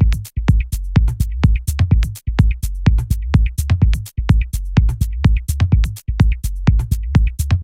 This is a minimal house loop, with a kick and a bass line.